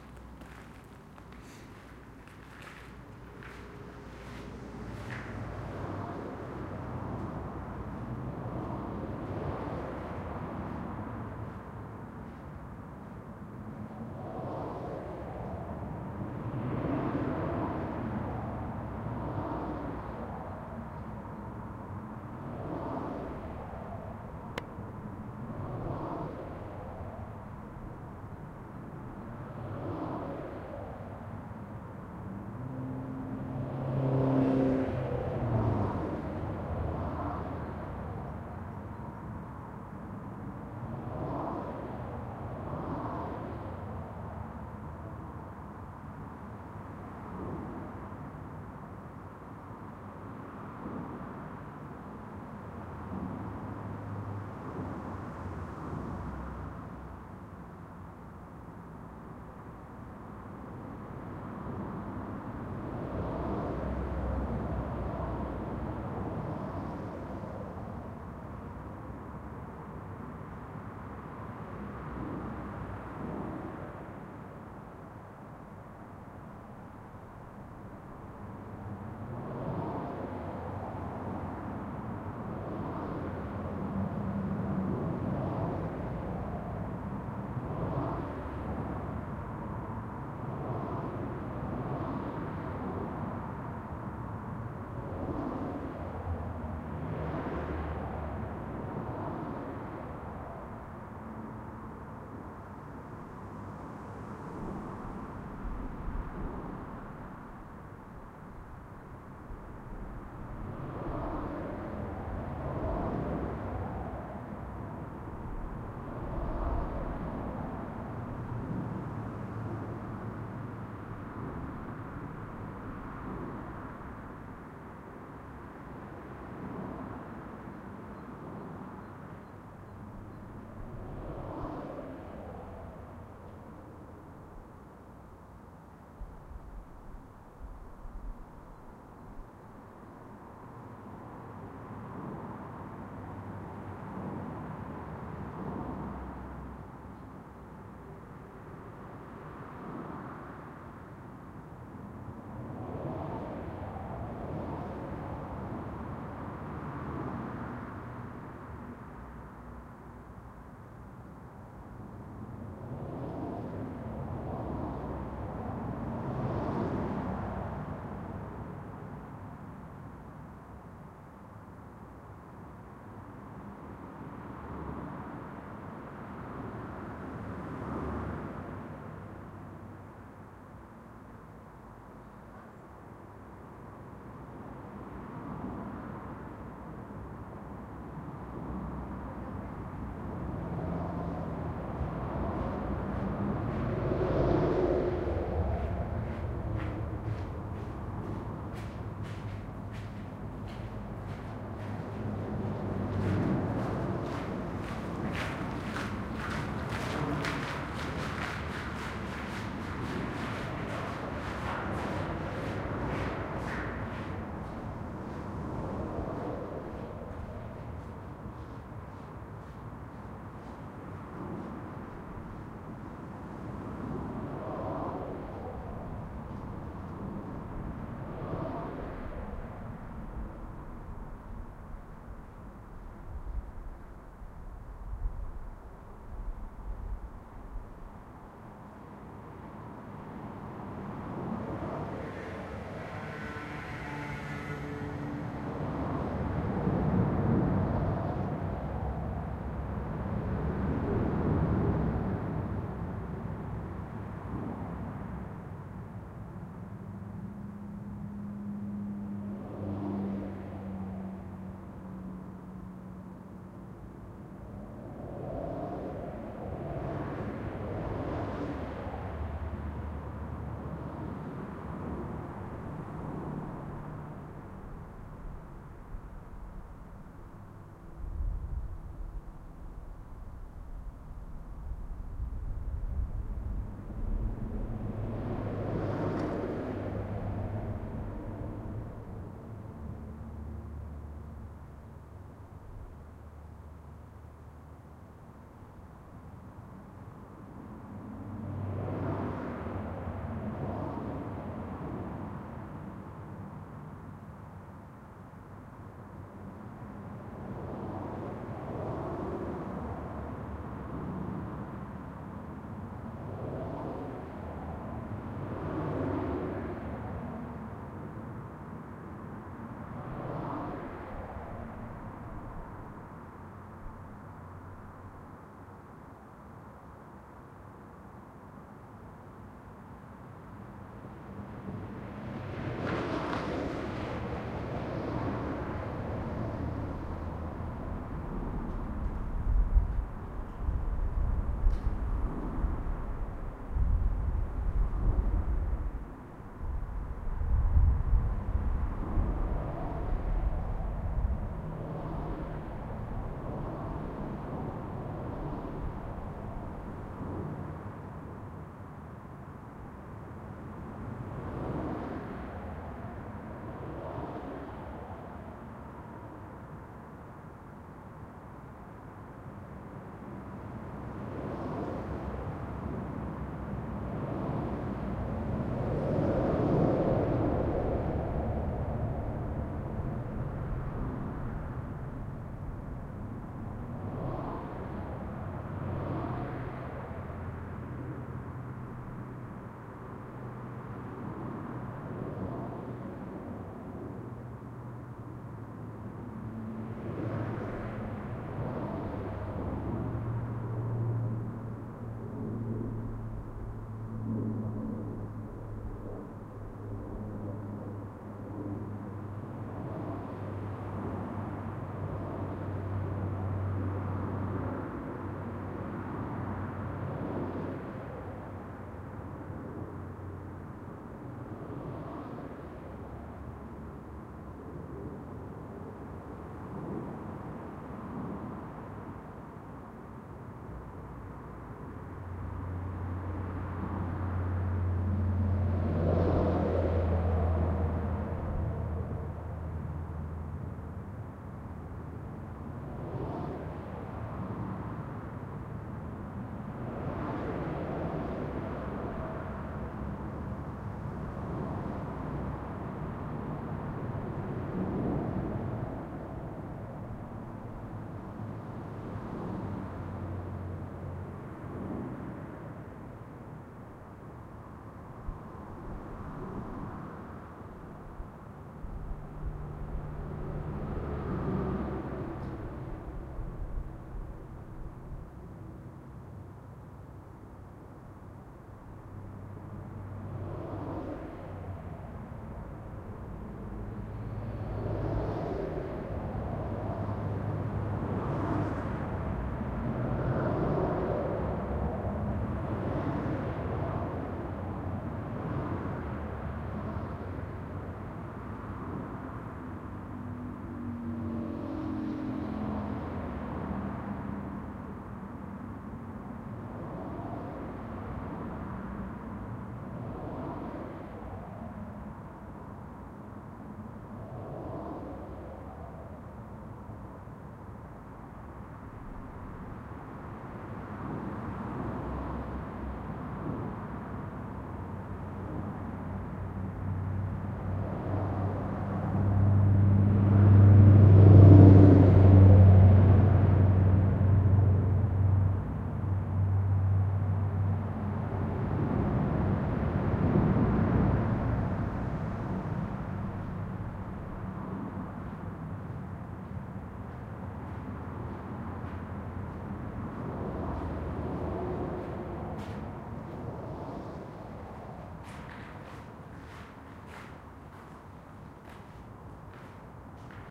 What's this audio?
Recorded in an underpass below a highway. Apart from cars going by you can hear some footsteps, a moped and a small aeroplane. Recorded with a Zoom H1.